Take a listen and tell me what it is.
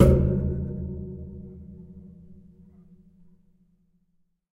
efan grill - hit 3
An electric fan as a percussion instrument. Hitting and scraping the metal grills of an electric fan makes nice sounds.
electric-fan, metallic, reverberation, sample